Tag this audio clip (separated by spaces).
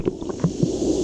glug hydrophone field-recording underwater water bubble submerged